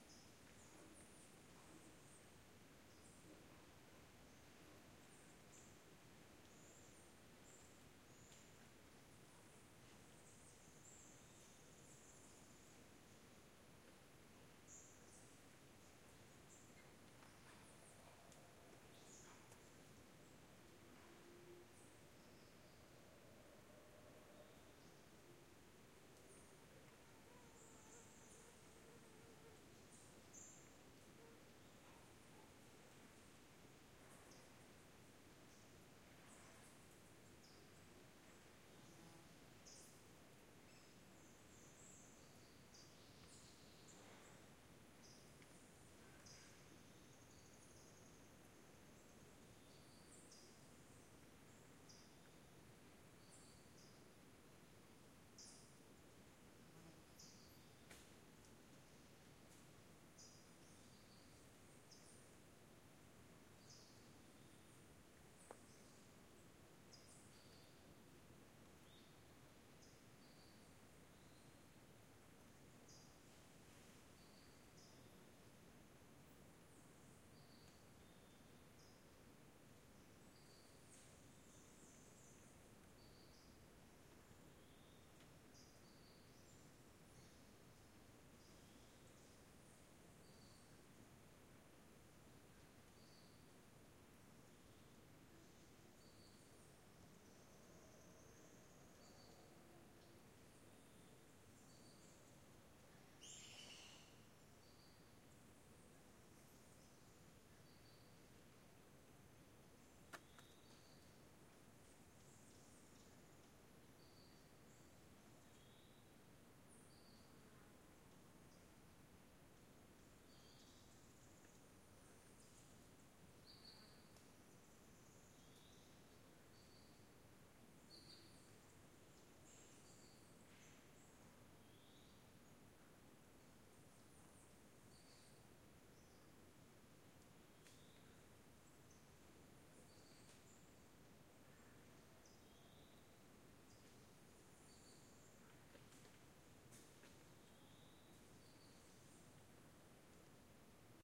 Quiet recording In "La Selva" (Forest) in Chiapas, Mexico.